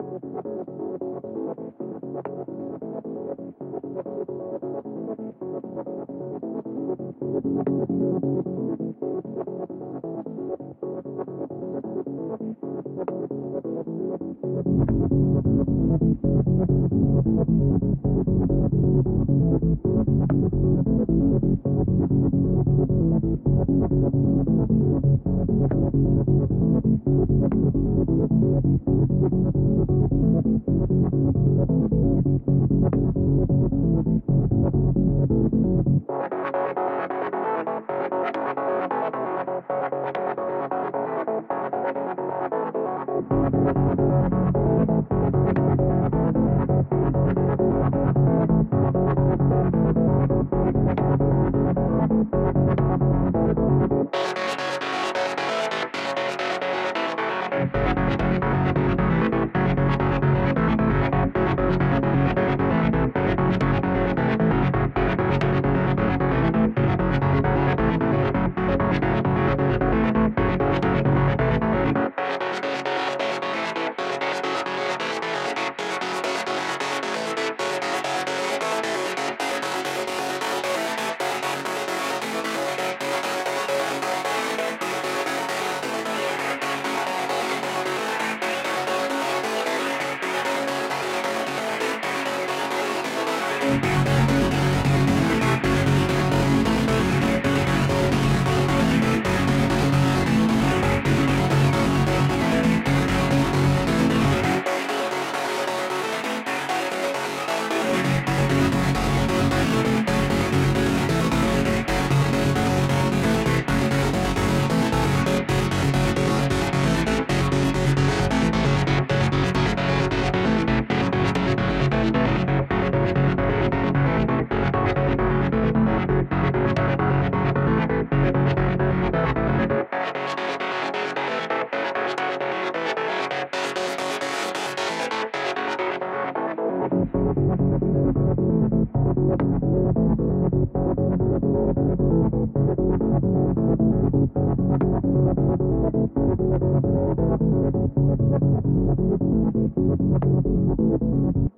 let the organ do the talking
loop, synth, organ, synthsizer, bassline, bass, groove, electronic, sylenth, ableton, techno, synthesizer